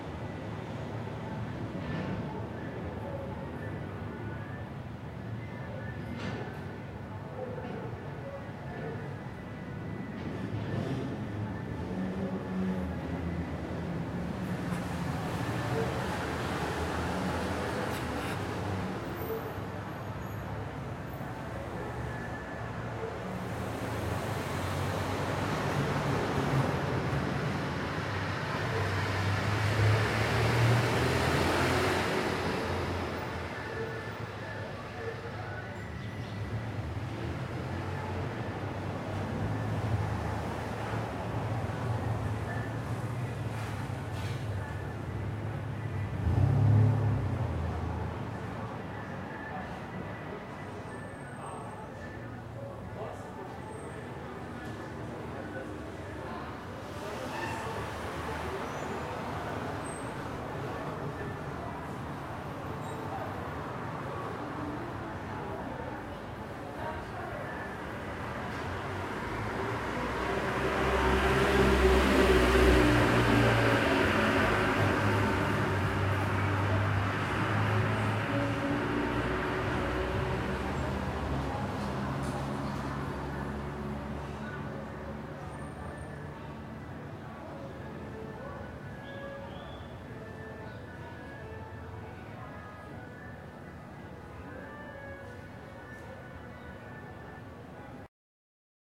Amb Resid Ext 001
Ambience, Brazil, Residence, Residential, Traffic